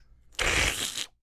sound effect of a tongue stretching